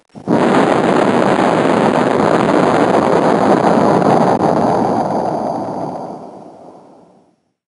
Explode II
An explosion sound effect I made some time ago my blowing into a microphone and slowing it down.
explosion, version-ii, big, huge, explode, bang, boom